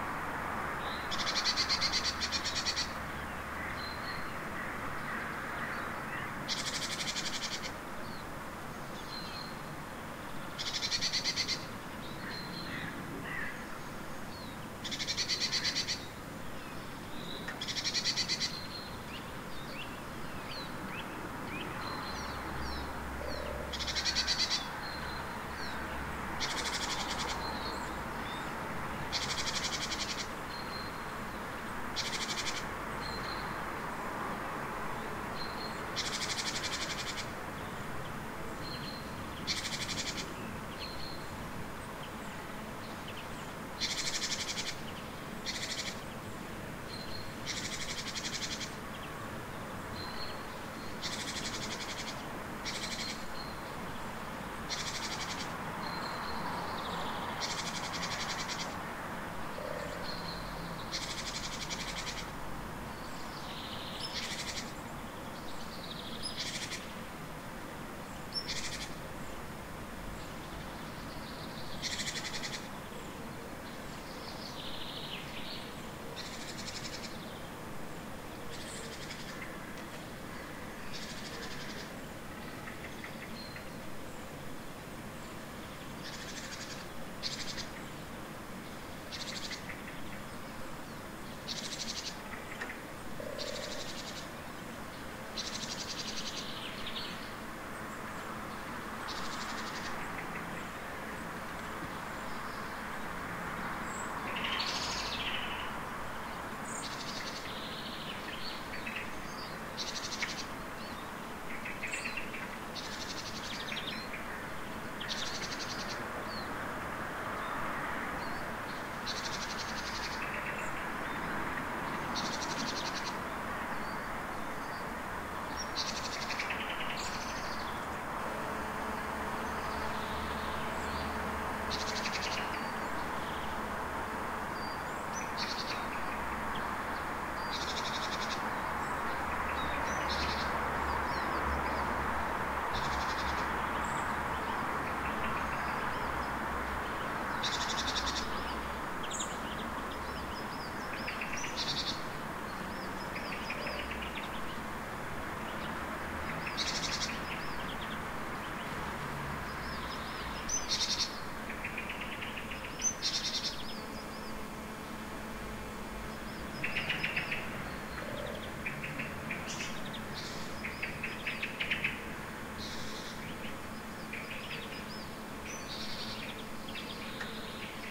Recorded with Zoom H2 at 7:30 am. Near street-noice with several birds
6channel, birds, garden, graz, morning